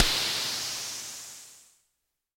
EH CRASH DRUM13
electro harmonix crash drum
crash, drum, electro, harmonix